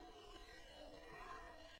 Scratch between the edge of a block of wood and a table of metal. Studio Recording.
edge, interaction, metal, scratch, wood